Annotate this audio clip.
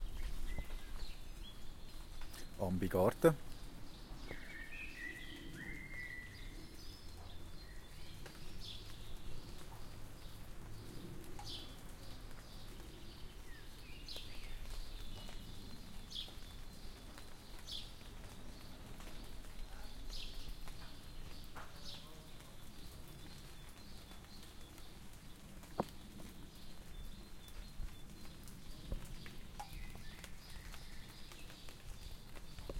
ambiance in our garden with some soft raindrops
birds, ambi, spring, ambiance, garden, atmo, rain